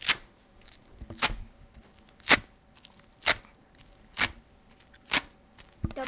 This is one of the sounds producted by our class with objects of everyday life.